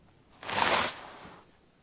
Pulling Tissue From Box
Pulling a tissue from a box of said tissues
tissue, field-recorded, fx, Kleenex, pulling, sfx